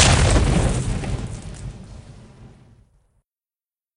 Grenade Fire Eplosion 02
Synthetic Sound Design, Created for an FPS shooter.
Credits: Sabian Hibbs Sound Designer
:Grenade Launcher FPS:
Action, fire, Launcher, Gun, SFX, FX, gunshot, Grenade, Firearm, effects